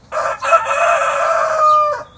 Single Cock-a-doodle-doo. EM172 Matched Stereo Pair (Clippy XLR, by FEL Communications Ltd) into Sound Devices Mixpre-3. Recorded near Olivares (Seville, S Spain)
birds, crowing, nature